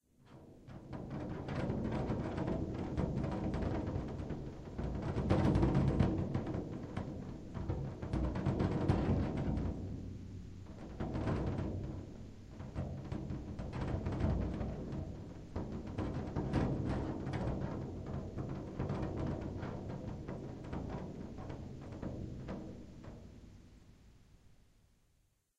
Tapping A Bass Drum Skin With Fingers
bass, drum, fingers, percussion, tapping